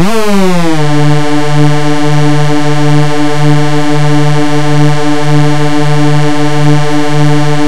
fake hoover2
Fake hoover with detuned waves